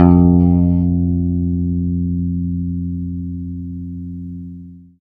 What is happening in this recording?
C S P-Bass pick F2
One in a collection of notes from my old Fender P-Bass. These are played with a pick, the strings are old, the bass is all funny and there is some buzzing and whatever else including the fact that I tried to re-wire it and while it works somehow the volume and tone knobs don't. Anyway this is a crappy Fender P-Bass of unknown origins through an equally crappy MP105 pre-amp directly into an Apogee Duet. Recorded and edited with Reason. The filename will tell you what note each one is.
fender, bass, old, precision, notes, pick, multi-sample